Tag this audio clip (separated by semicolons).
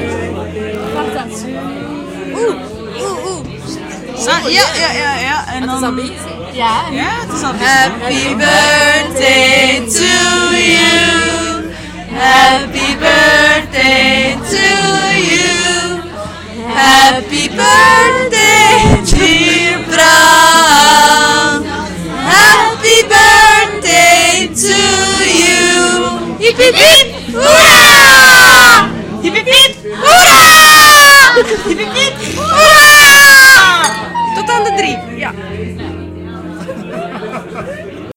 birthday; chant; female; ghent; voice